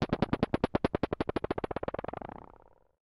Verre Sur Béton Rebonds 1
ambient, misc, noise